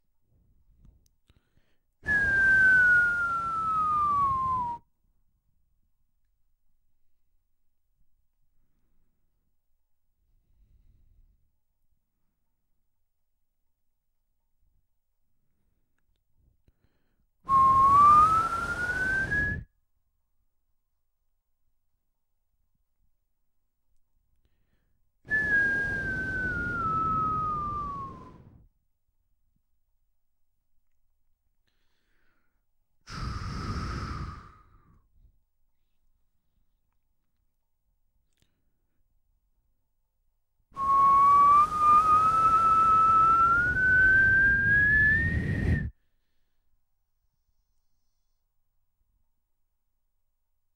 Sound of someone whistling, imitating the sound of a bomb dropping.